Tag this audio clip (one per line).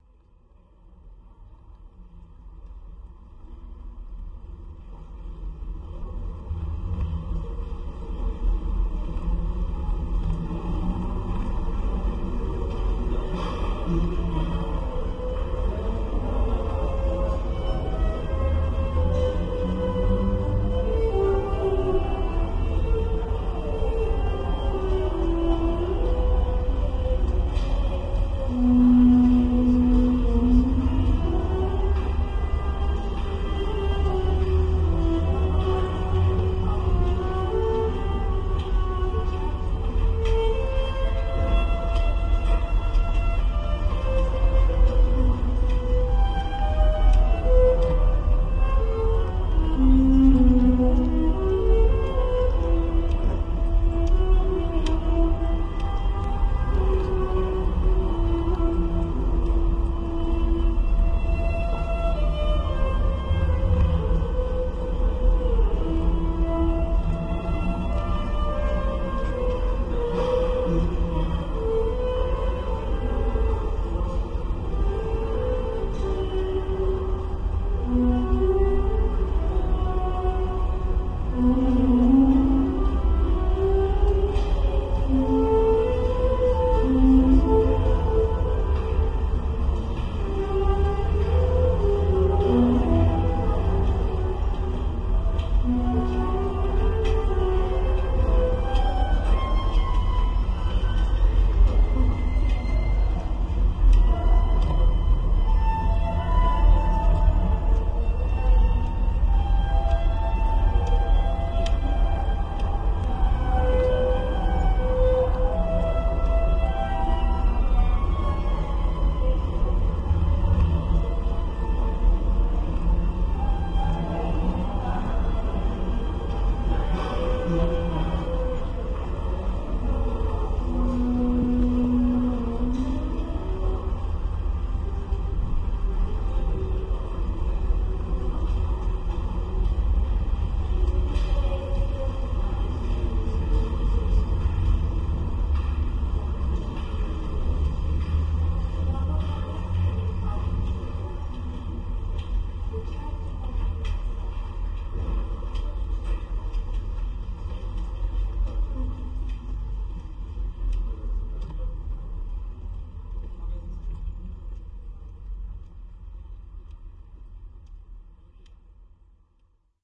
ambient
field-recording
movie-sounds
street
street-singer